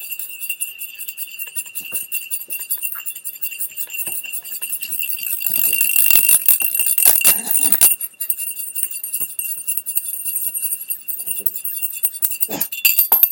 Spinning penny around large teacup
class, MTC500-M002-s14, sounds